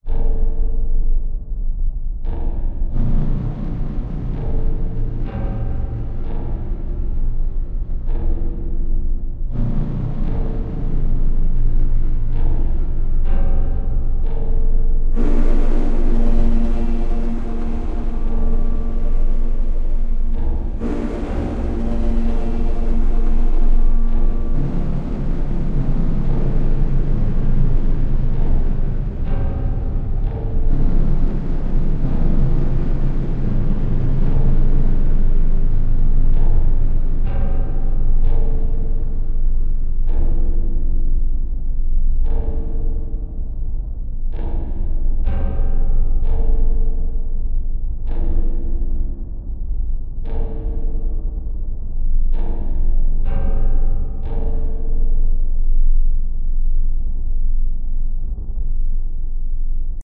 Village Evil Bell Project
A little theme track as a part of my "Themes" projects, this time silent Hill/Resident Evil inspired.
Ambient, Atmosphere, doom, evil, fear, horror, nightmare, orchestra, rising, Scary, sinister, Spooky, thriller, Video-Game, Violent